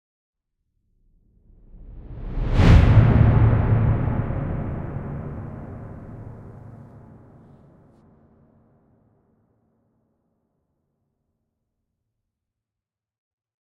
impact-reverse-soft
The reverse of a bass drum audio tail leading into a slow attacking, reverb-laden drum impact.
intro, drum, orchestral, hit, reverse